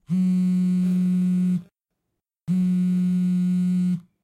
Vibrating Mobile Phone 2 x 1500ms
wooden, mobile, phone, Xperia, Sony, table, vibrating